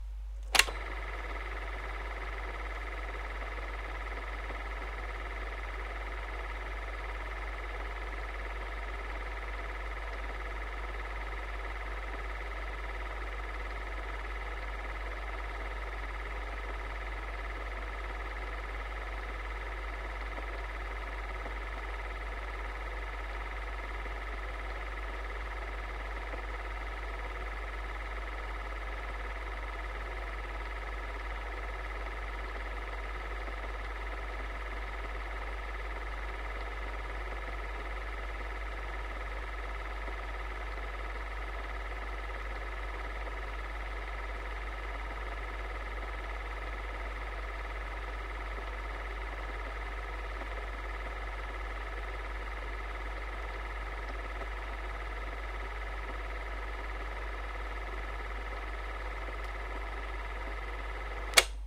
It's a recording of a PORST sound 150D super 8 projector.
As a result of mechanical noise i had to use an equalizer.